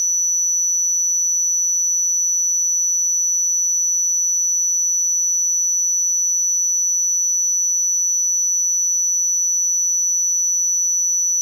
Doepfer A-110-1 VCO Triangle - F#8
Sample of the Doepfer A-110-1 triangle output.
Captured using a RME Babyface and Cubase.
A-100, A-110-1, analogue, electronic, Eurorack, modular, oscillator, raw, sample, synthesizer, triangle, triangle-wave, waveform